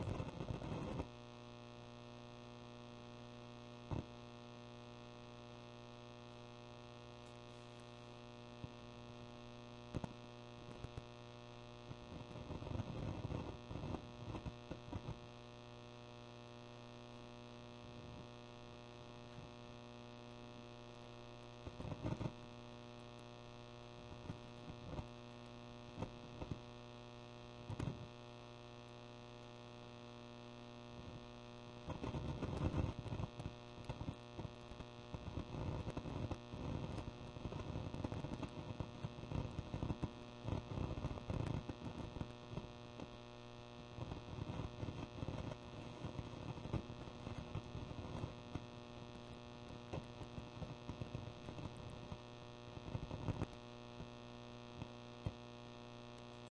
amp noise
The buzz, hum, and static sounds that emit from my Rhodes Jonus amp. Recorded with AT4021 mics into an Apogee Duet.
amp, buzz, click, crackle, hum, noise, pop, static